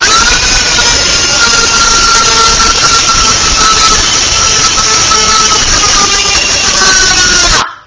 A woman screaming.
666moviescreams, female, pain, scream, woman